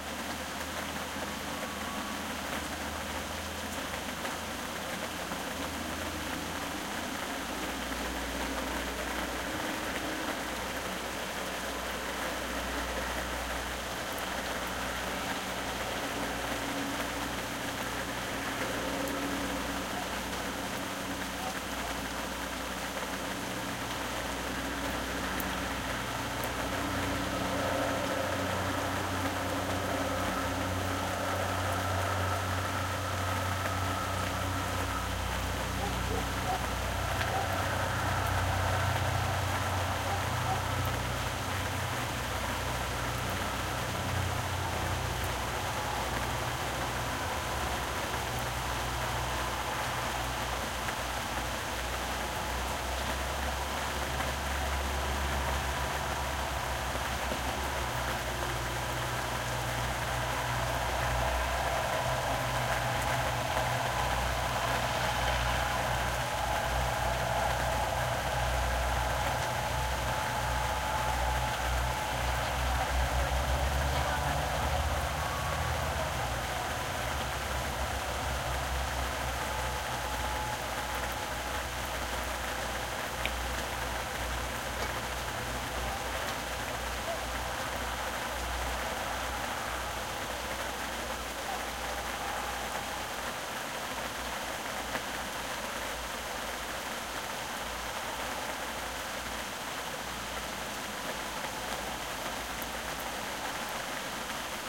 ambiente day rain loud vehicle
Rain ambience with a vehicle in background that is driving away
ambience, atmosphere, atmospheric, background-sound, day, far-away, field-recording, rain, soundscape, summer, summer-time